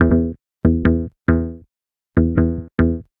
BAS rope 149bpm
Playing a tight rope.
bass, loop, rope